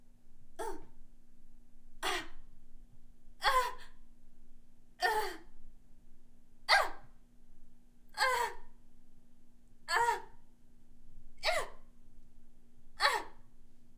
Girl Taking Damage
Me making pain sounds, as if I were in combat.